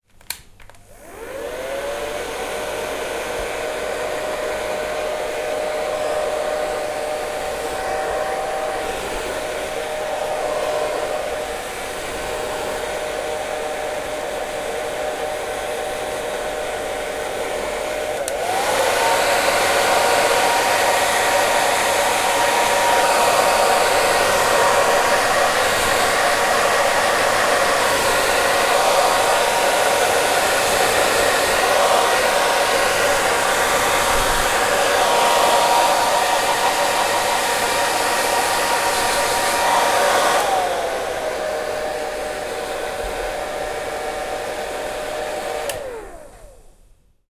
hair dryer good
hair, good